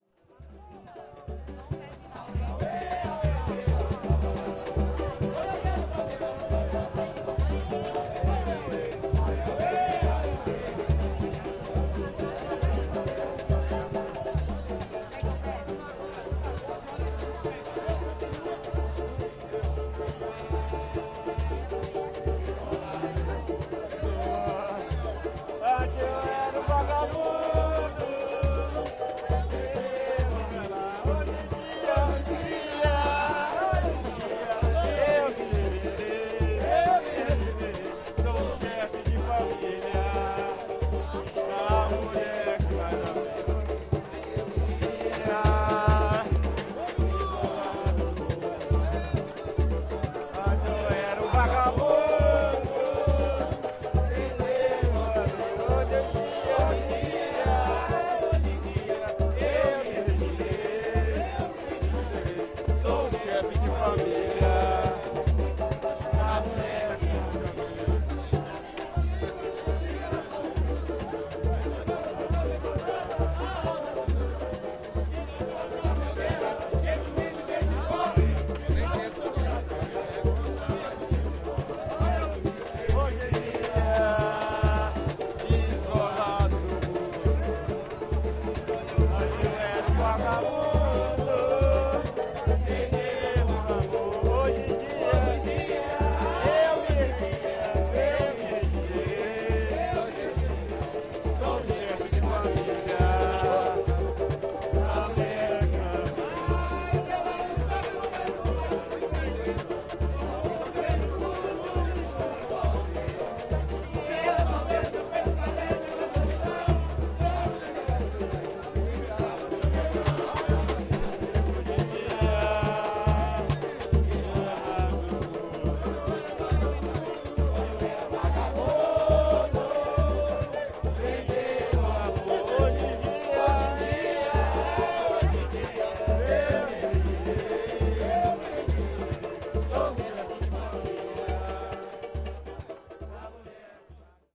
Group of young musicians in one of the downtown streets of Belo Horizonte, Minas Gerais, Brazil on a sunday noon, performing the pagode, a sort of samba music, using small percussion, cavaquinho and banjo, singing a popular tune. People around listening and joining by singing the refrain together with the musicians.